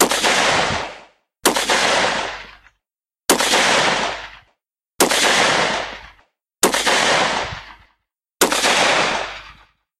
Rifle Shots
Shots from a Sako85 Hunting Rifle, has been noise-reduced so sounds a little bit metallic, but still more than servicable.